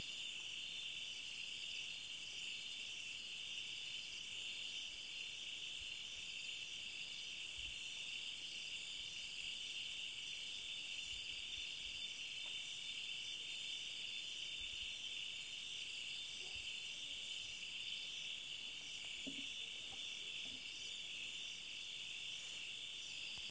I took this recording at the nationalpark akagera in rwanda at night